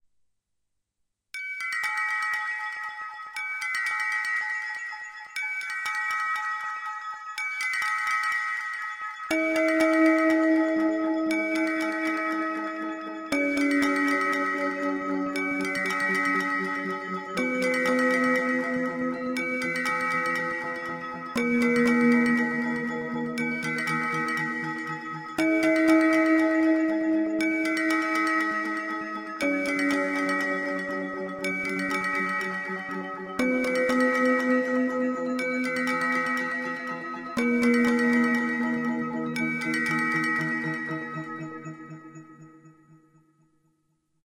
fantasy tune
Fantasy music created for various purposes. Created with a syntheziser and recorded with MagiX studio.